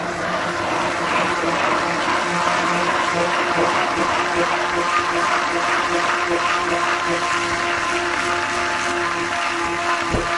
We will use this sounds to create a sound postcard.